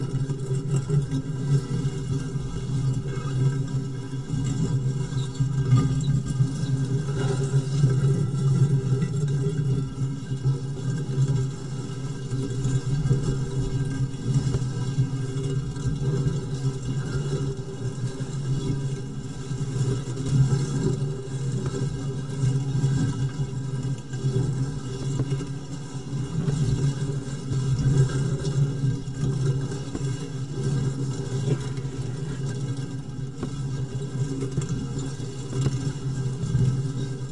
water - memory #2 grandfather's rainwater bucket.all acoustic no overdubs or electronic processing. brush, drum, stainless-steel plate.rec.
acoustic, percussion